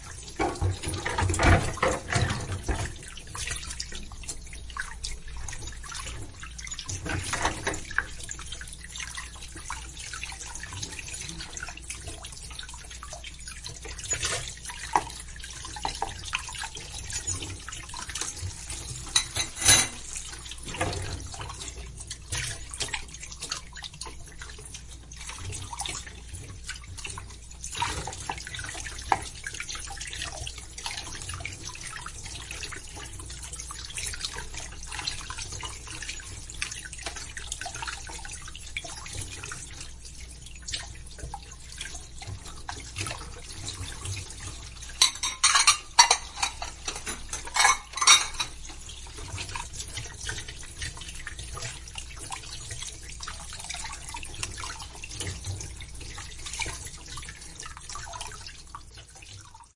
Washing tableware1
wash, washing, water, clean, kitchen, dishes, tableware, cleaning, sink